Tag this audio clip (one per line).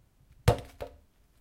VideoGame
seaside